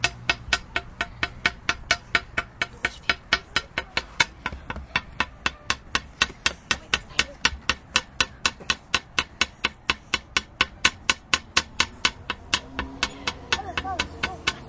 Sonic snaps CEVL Glass ticks
Field recordings from Centro Escolar Vale de Lamaçaes and its surroundings, made by pupils.